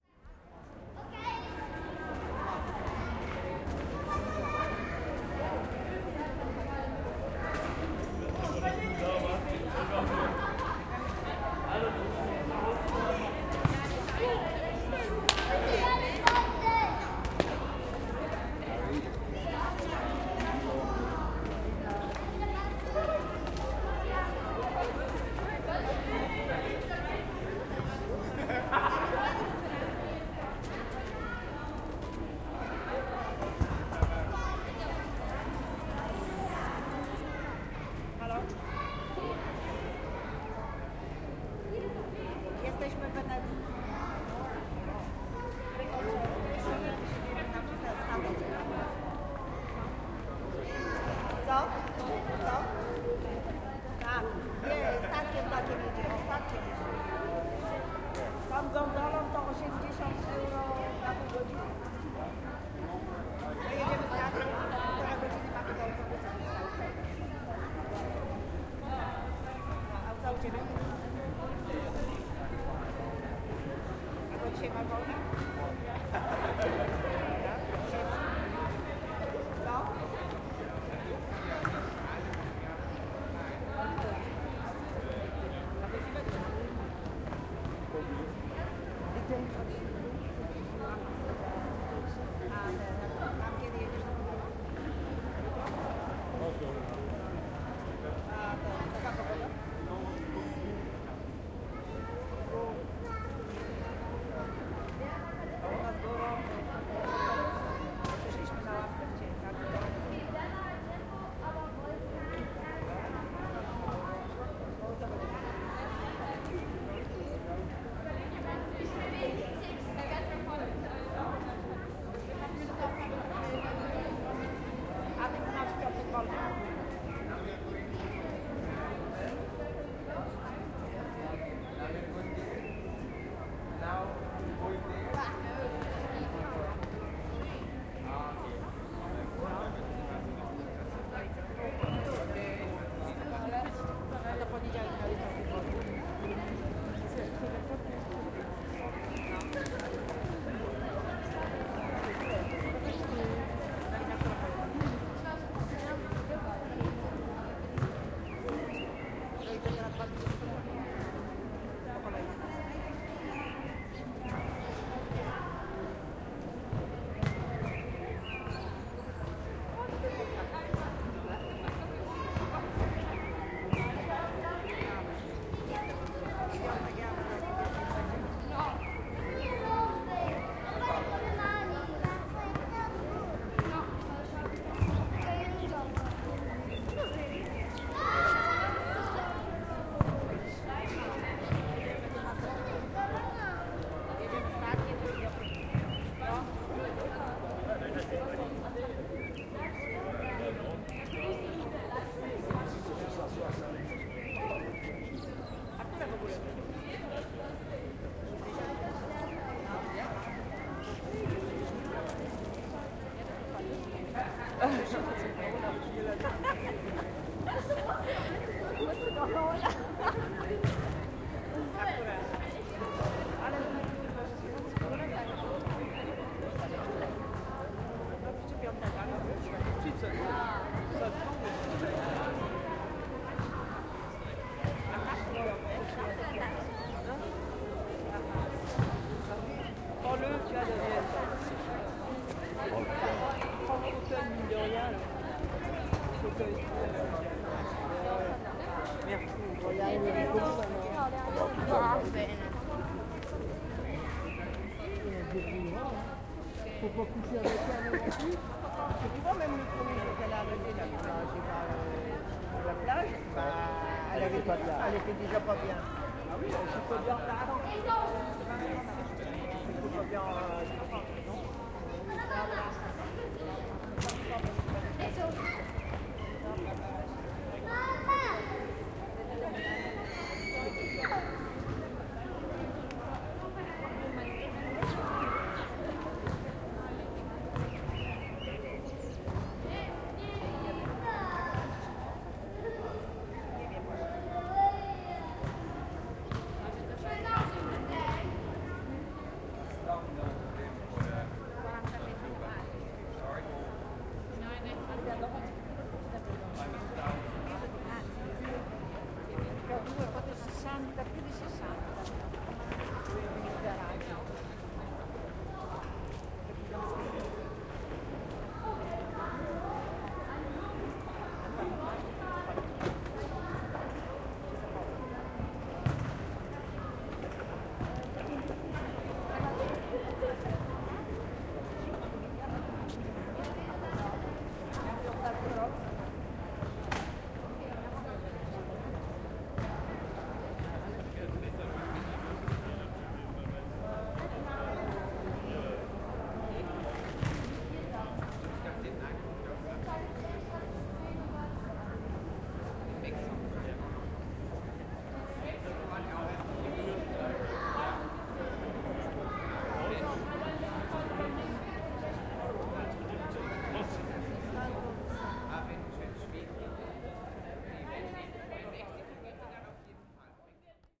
Recording in a public square outside Vivaldi church in Venice. Kids playing soccer, Italian speech, birds, tourists.